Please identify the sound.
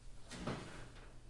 Oven Door open 10 feet Bricker
opening an oven
door, open, oven